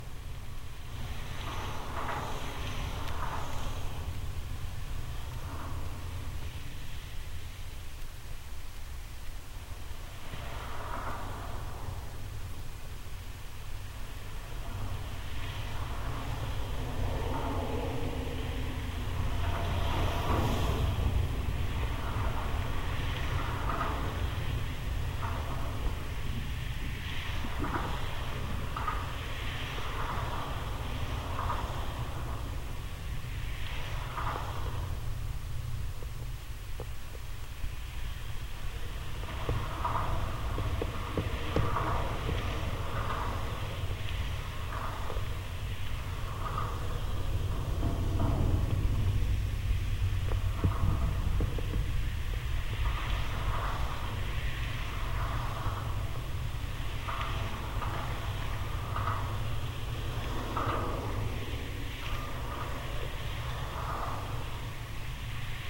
GGB suspender SE24SW
Contact mic recording of the Golden Gate Bridge in San Francisco, CA, USA at southeast suspender cluster #24. Recorded December 18, 2008 using a Sony PCM-D50 recorder with hand-held Fishman V100 piezo pickup and violin bridge.
bridge, cable, contact, contact-microphone, field-recording, Fishman, Golden-Gate-Bridge, piezo, sample, sony-pcm-d50, V100, wikiGong